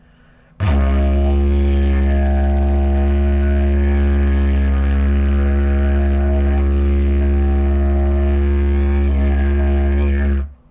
Didg Drone 5
Sounds from a Didgeridoo